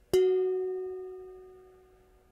pan hit4
hitting my kitchen pan
hit, kitchen, pan, pot